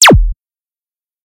Flashcore kick 3
flashcore, kick, flash